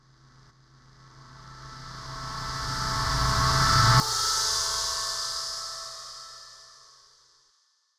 rise-crash
i designed these in renoise stacking various of my samples and synths presets, then bouncing processing until it sound right for my use
appear, build, sound-design, swell, up, white